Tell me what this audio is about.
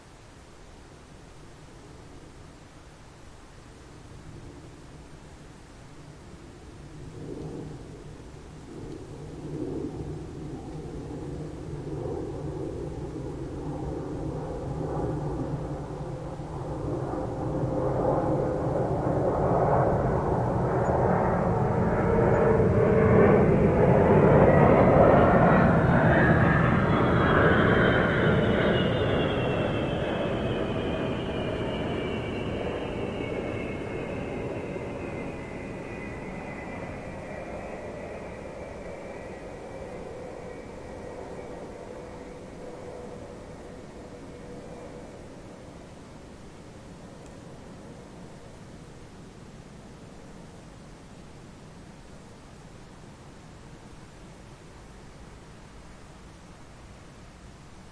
Airliner flying low over a rural area at night.
These are the REAR channels of a 4ch surround recording.
Recorded with a Zoom H2, mic's set to 120° dispersion.